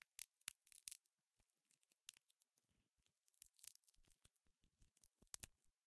Breaking open a pecan using a metal nutcracker.